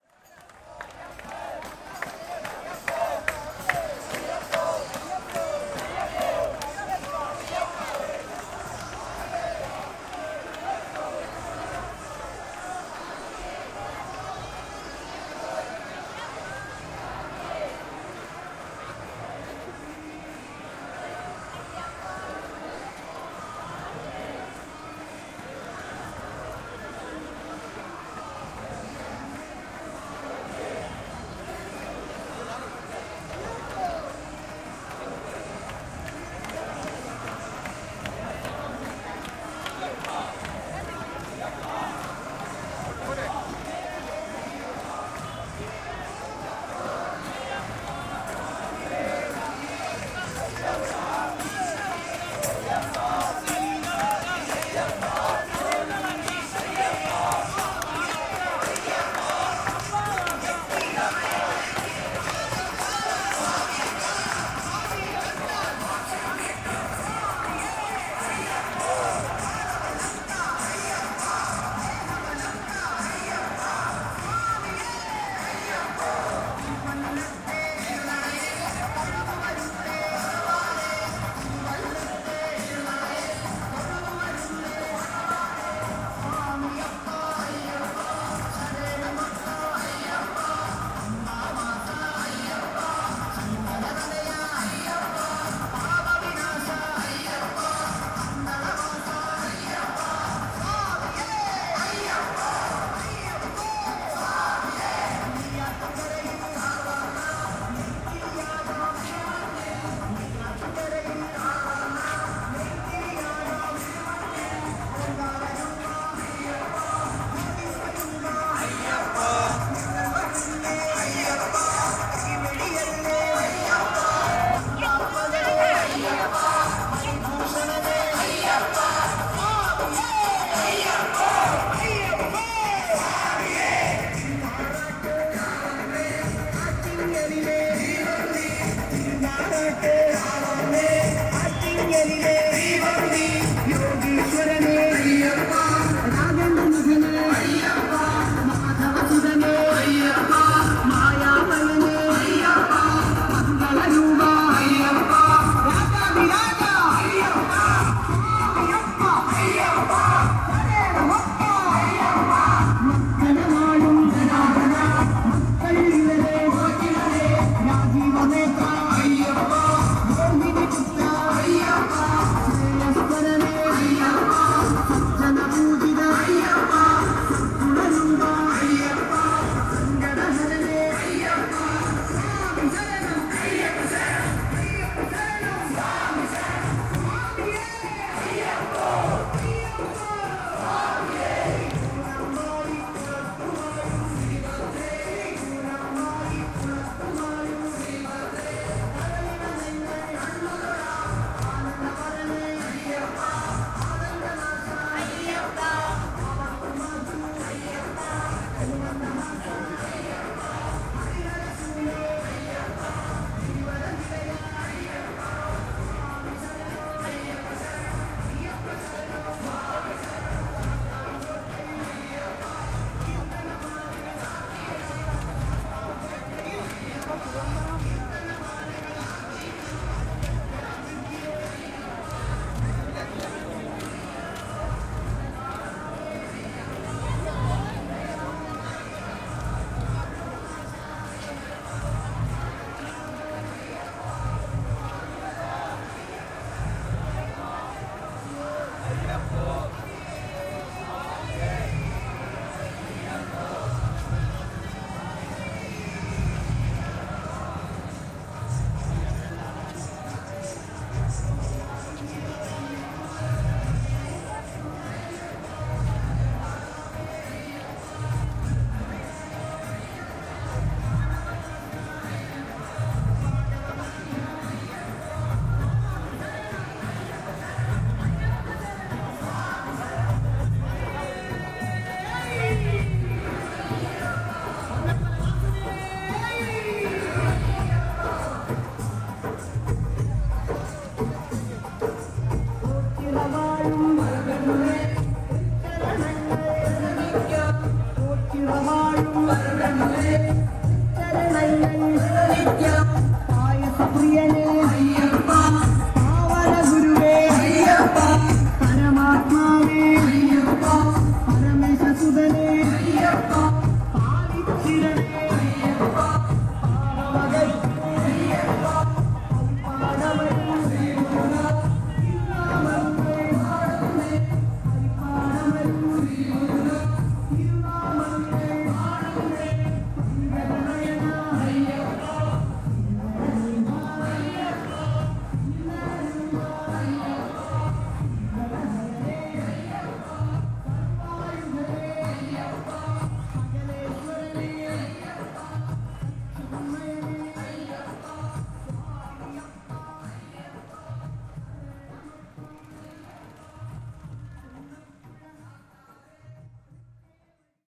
Protest-Recording-5
Protests on the streets of Kerala, India in January 2019
chant, crowd, demonstration, march, parade, political, streets